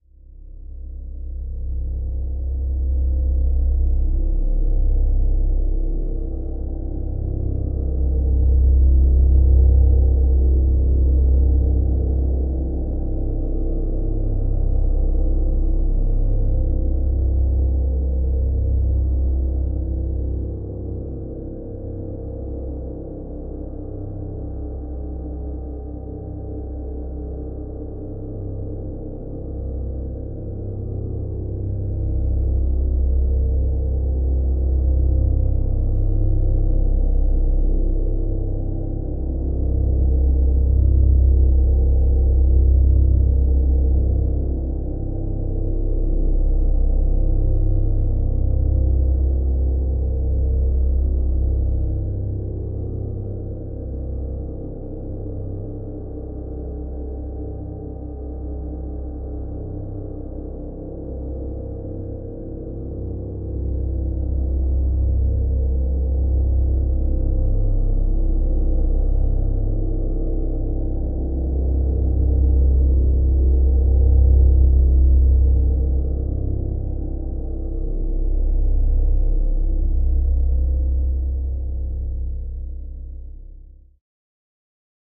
Continuous drone with a soft attack and decay. Recorded in Reape using A.I.R. Loom additive synthesizer, Blue Cat Phaser and ReaVerb IR reverb. The reverb response is that of York Minster. The complete sound was then dropped by two octaves resulting in a tone of C2 (approx 65Hz)